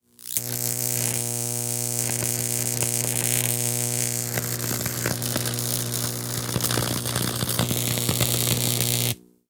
This is the sound of a lemon being the of test subject of the powerful high voltage shock from a microwave oven transformer.